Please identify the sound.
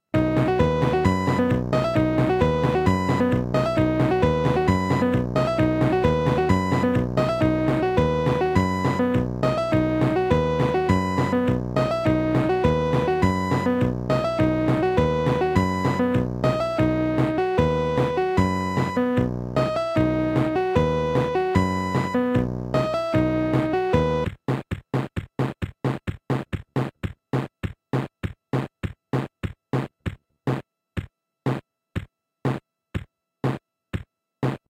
The accompaniment section from a cheap kids keyboard - the description doesn't really match the sound.
The accompaniment plays at three tempos followed by percussion only version of the same.
electronic, auto-play, accompaniment, march, fun, kitsch, cheesy, lo-fi, casiotone